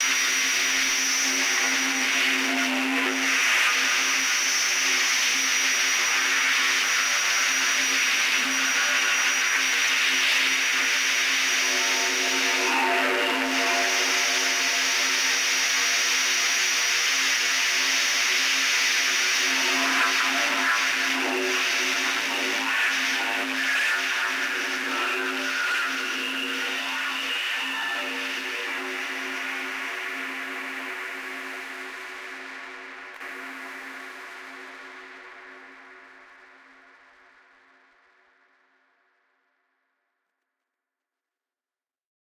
Distorted Elemnts 01
various recordings and soundfiles -> distorted -> ableton corpus -> amp